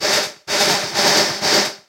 Perc Loop 1
Looped shots, rhythmic sounds for electronic experimental techno and other. Part of the Techno experimental Soundpack
abstract, groovy, loop, perc, percussion-loop, quantized, rhythmic